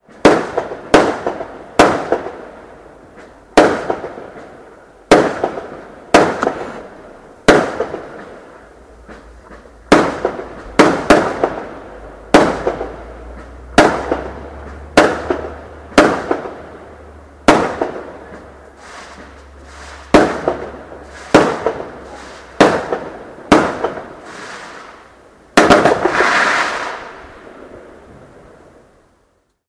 Fireworks, Standard, A
Raw audio of standard fireworks.
An example of how you might credit is by putting this in the description/credits:
explosion, boom, standard, bang, fireworks, rocket